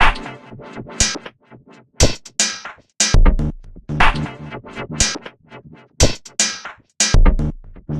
Massive Loop -42
An experimental loop with a minimal touch created with Massive within Reaktor from Native Instruments. Mastered with several plugins within Wavelab.
drumloop, loop